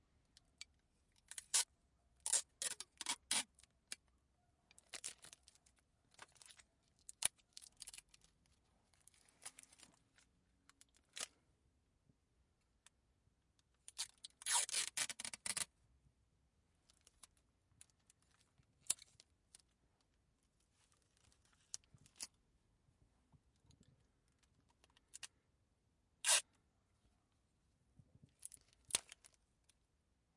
Unrolling sticky-tape, tearing sellotape
cellotape, tape, plastic, sticky-tape, adhesive, sticky, stationary, foley, sellotape